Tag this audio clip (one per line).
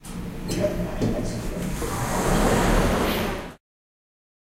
arrive
building
close
lift
machine
move
open